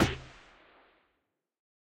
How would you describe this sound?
Crunch Drum 02
Percussion created by layering various drum sounds together and applying a few effects in FruityLoops, Audacity and/or CoolEdit. A snare sound, lightly distorted.
Drum, Hat, Layered, Percussion, Processed, Snare